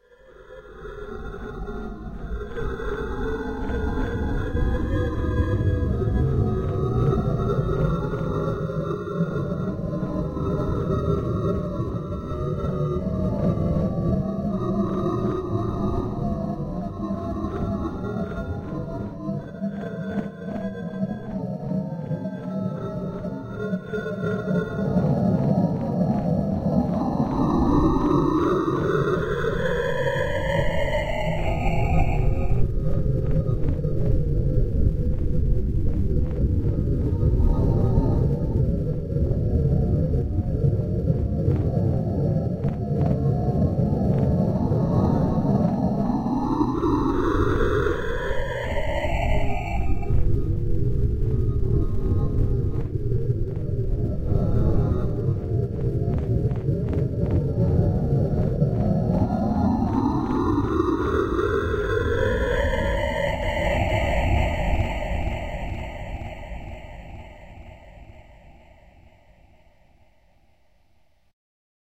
Deep space wave
noise, sound, space, transformed, wave, weird